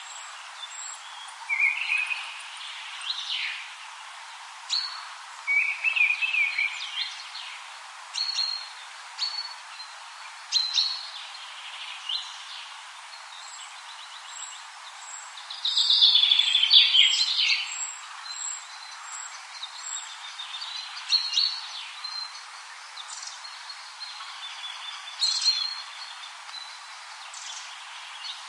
Walk through the woods in the spring in Denmark